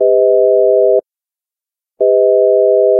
Augmented Triad
An augmented major chord using equal tempered then just intonation. The 1st, 3rd, and 5th are as follows (ratio from the root in just intonation):
1/1, 5/4, 19/12
intonation, just, equal-tempered, chord, augmented